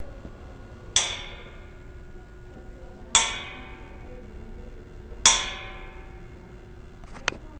me knocking on a metal column